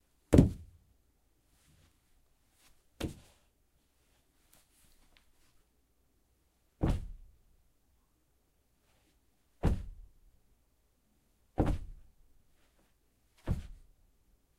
Various impacts of shoulder and back against a wall
Recorded with Zoom H4N pro internal stereo microphones.